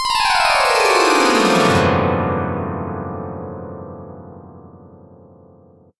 36-string downwards glissando on a synthesized autoharp. Made with SoX: